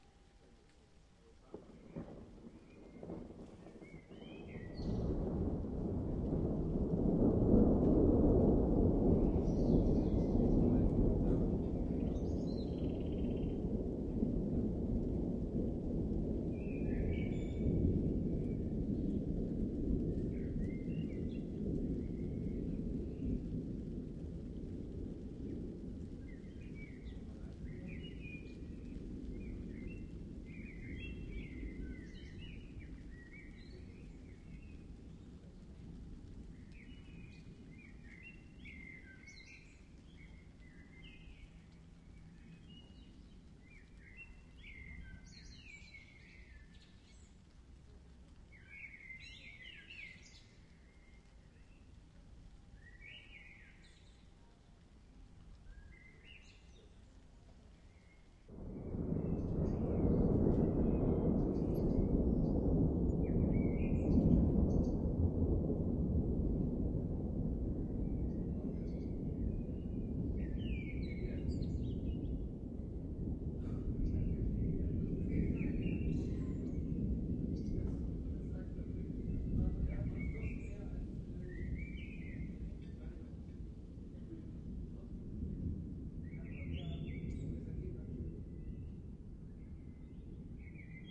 The first one I heard this year. Recorded with a Zoom H2 recorder in
Hanover / Germany near the city centre in a backyard, to blend out some
of the city noises. Some people are talking in the background and the
thunderstorm was happening in the distance. This is part 2 of the
recording.